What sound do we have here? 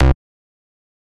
Synth Bass 007
A collection of Samples, sampled from the Nord Lead.